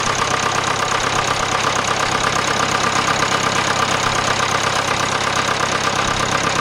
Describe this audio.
It's a sound of a big motor, who never ends.
fuel, grande